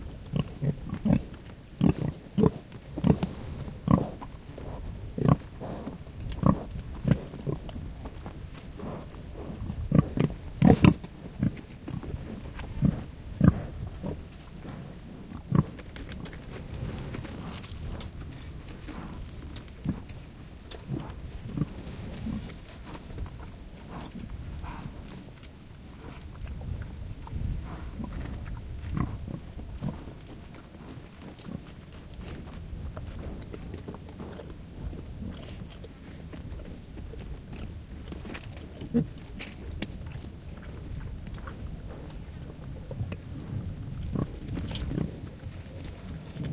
A few black Iberian pigs eating in their pen durring a hot still afternoon in Extremadura, Spain.
Recorded a while back probably when I was still using an H4N and an NTG-1